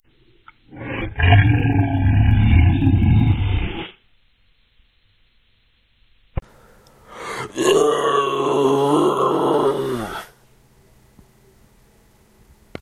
Orc Growl with Raw recording
An Orc like growl for fantasy creatures. This file includes my raw vocal recording to work with as well. Enjoy ;)
Roar, Creature, Horror, Monster, Orc, Zombie, Snarl, Growl, Fantasy, Animal